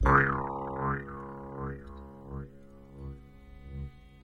jewish harp time stretch
the sample was stretched so as to have a duration twice as long as the original, resulting in a weak, "warbly," less precise timbre.